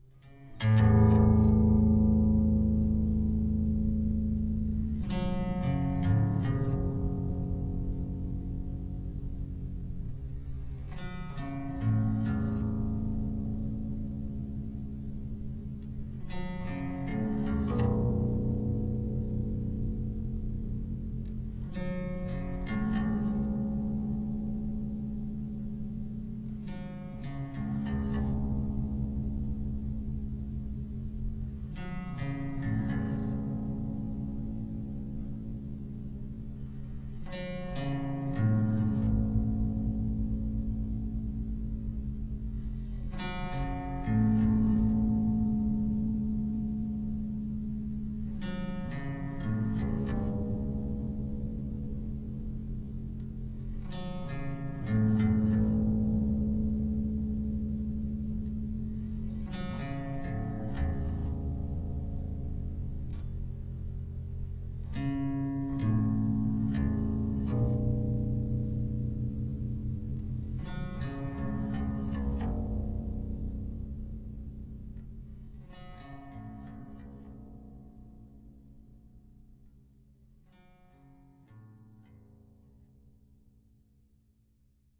Guitar drone
artificial, drone, guitar, multisample, string